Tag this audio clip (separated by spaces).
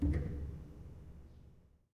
ambience background bench creaks hammer keys noise pedal pedal-press piano piano-bench stereo